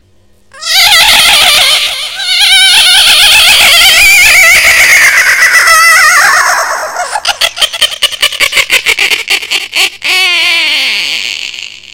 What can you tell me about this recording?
moan5 ECHO HIGH PITCH
moan 5 is like a witch cackle, this is the high pitch version. Done in audiocity by Rose queen of scream.
haunted, creepy, halloween